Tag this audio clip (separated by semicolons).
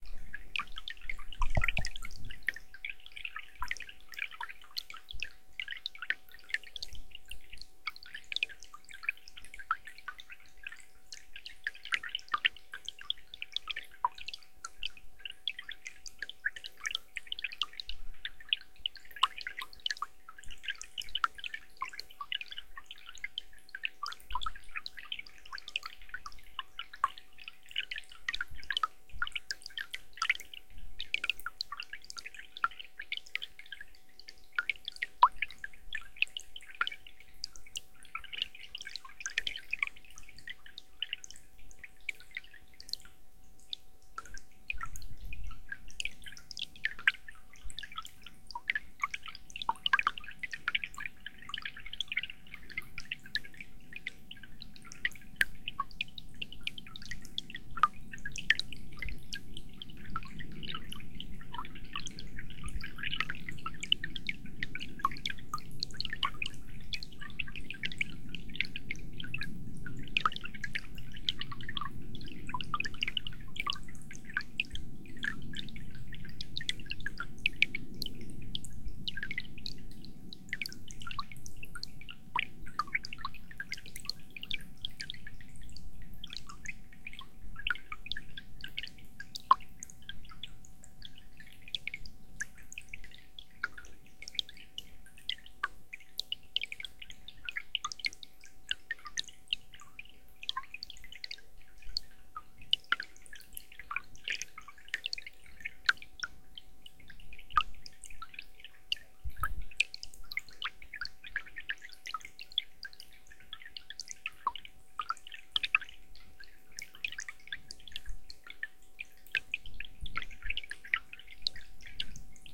ambient; dribble; field-recording; flow; loop; noise; relaxation; relaxing; river; stream; trickle; water